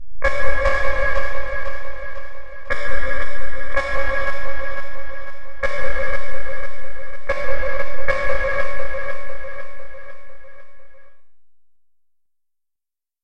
Quasi-Poppy Event count1
A tinkling of a yearning for a something. Like awaiting a mingling bregard, but the will can't fight the portionate passion.
awe, boogy-boogy, effects, electric, industrial, noise